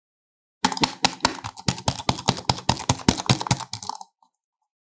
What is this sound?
Angry PC computer user - wild mouse click scrolling
agression, angry, audio, click, clicking, computer, crazy, impact, loser, lost, mad, mind, mouse, nerd, pc, scrolling, slamming, table, weird, wild